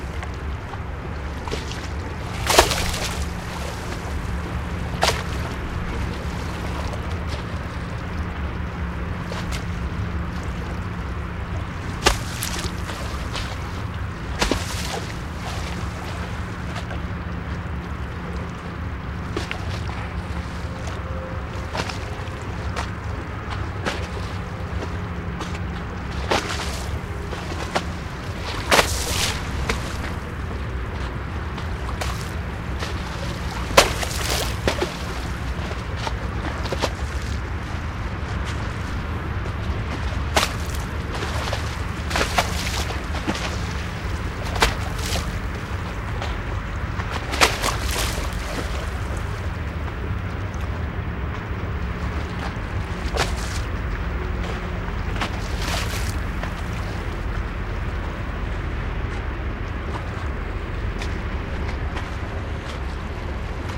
busy canal
Small river waves splashing against wooden sideboards. Recorded with Tascam DA-P1 and Sennheiser MKH-415T. Recorded on the 2nd of August 2005 in Utrecht.
river, water, surf, canal